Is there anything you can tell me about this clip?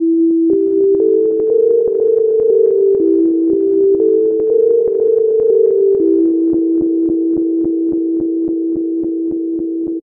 A trip-hoppish blues riff with reverb and an echo effect at the end.
trip-hop, blues, moody